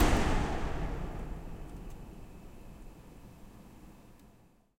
AC turn off fan only
My window air-conditioner on the fan setting. Fan shuts off. Please use this with the other samples in this pack for a realistic effect. Recorded on Yeti USB microphone on the stereo setting. Microphone was placed about 6 inches from the unit, right below the top vents where the air comes out. Some very low frequency rumble was attenuated slightly.